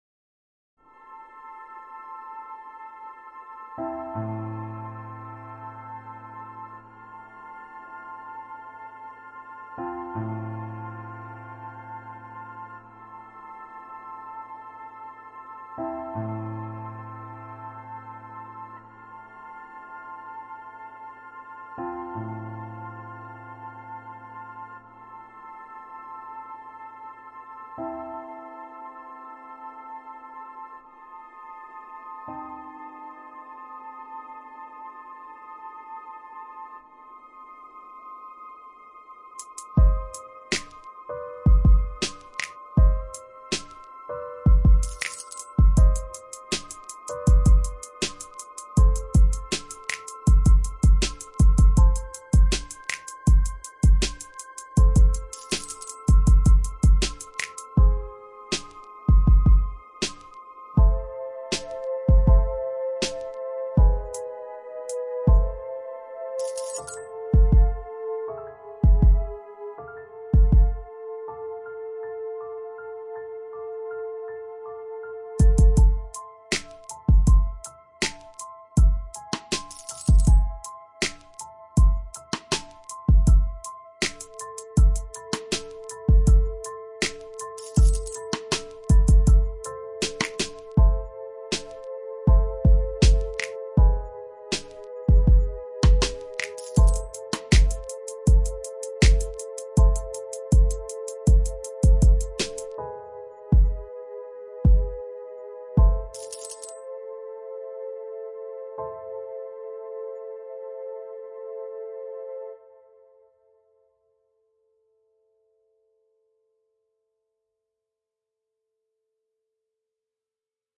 A short little beat track. More on the way.
Produced with FL Studio 12